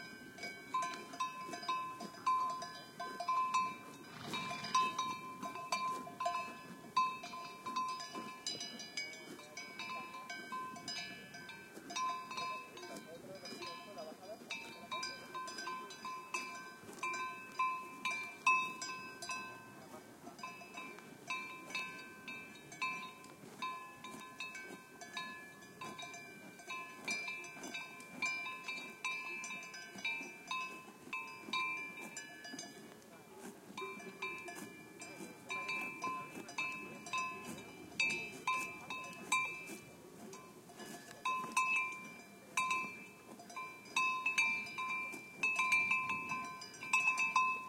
20110823 horse.bells.voices.06
horse bells, with some distant voices. PCM M10 recorder, internal mics. Recorded near Refuge de Pombie, by the Midi d'Ossau masif, in the French Pyrenees
ambiance, bells, cattle, field-recording, horse, mountain, pyrenees